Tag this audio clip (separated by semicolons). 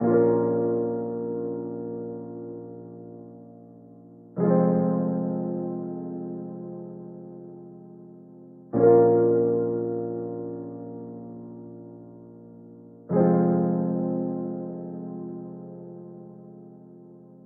eerie; hip-hop; progression; serious